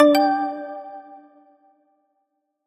UI Correct button2
game button ui menu click option select switch interface
button, click, game, interface, menu, option, select, switch, ui